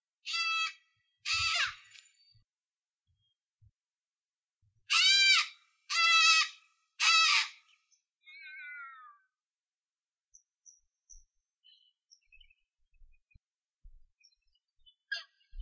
A galah calling in the Australian bush - Bells Rapids, Western Australia.
nature, western-australia, field-recording, galah, australia, bush